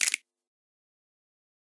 Recorded snaps and claps, layered and processed in FL12
clap, House, RnB, Jazz, Snap